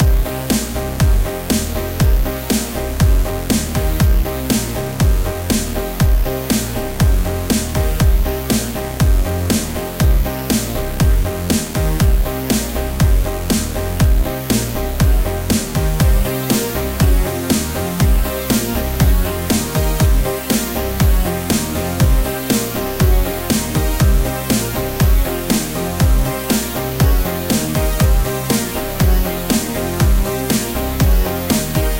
Heavy electronic loop. Created within Logic Pro X. Bass is the Eighties Poly Synth with a phaser on it.
bass, eighties, electronic, hard, heavy, loop, loops, mean, modulated, music, piano, processed, synth, synthesizer